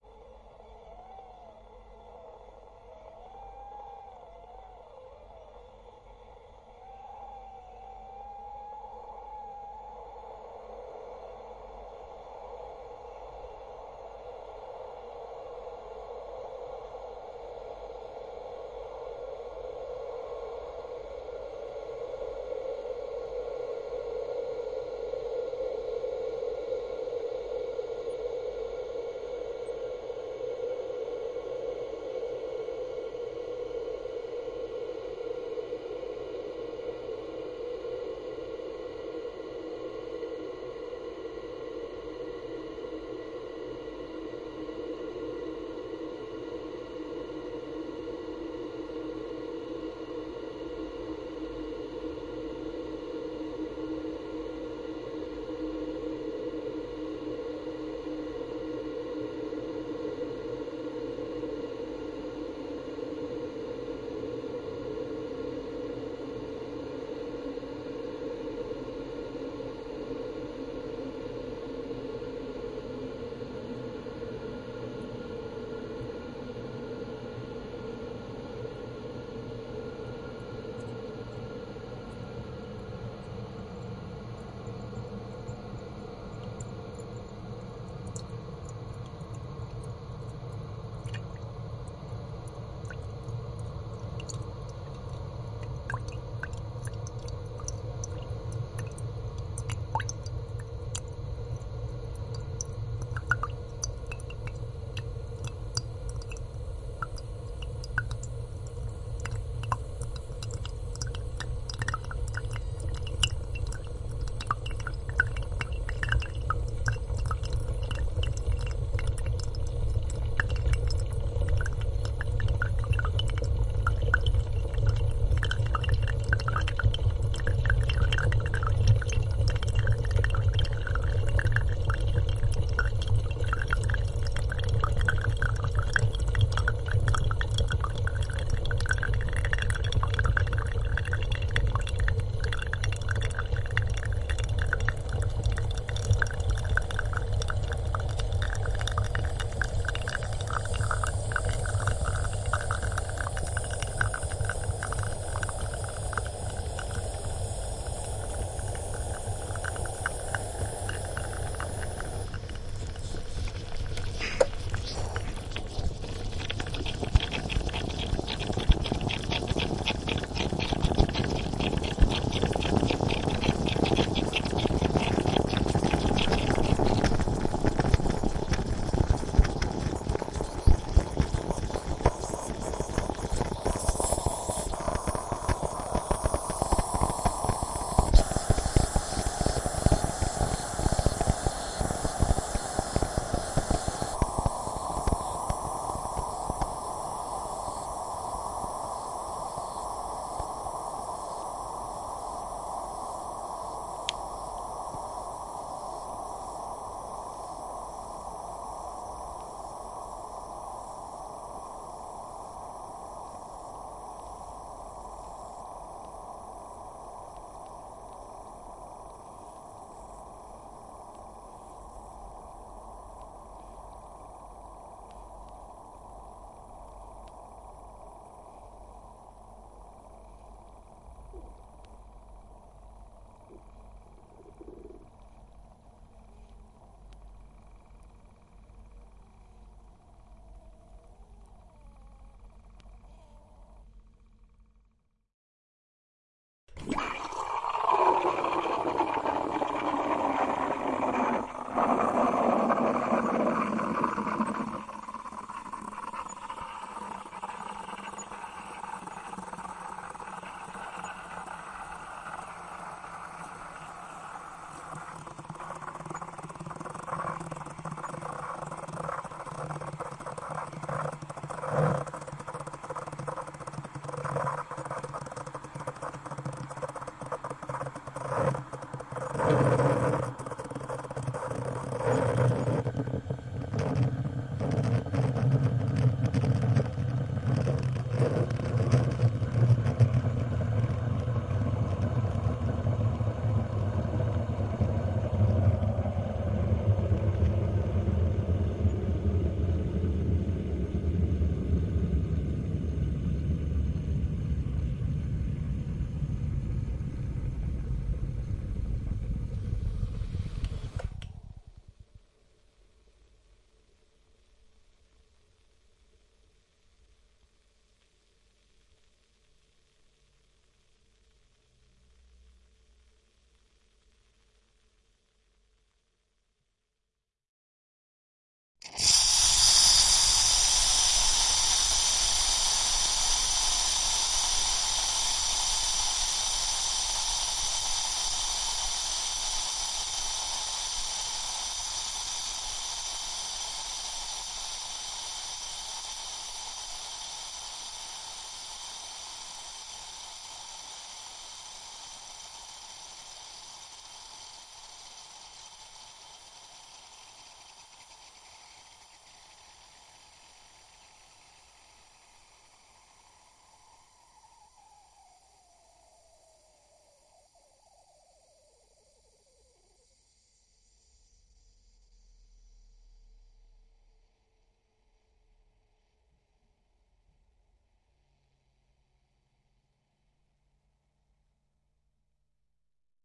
Coffee Bubbling, Milk Frothing, Steam Releasing
Making coffee with a domestic espresso coffee maker. The sound divides into three stages: coffee bubbling through, milk being frothed and finally steam pressure trapped in the water reservoir being vented